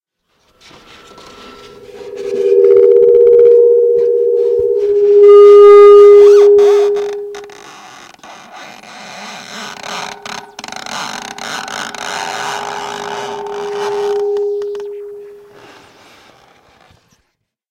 granulated plastic rub
unicel frotado con cable generando feedback, tiene un feedback más grave - medioso y un grano de unicel un poco más separado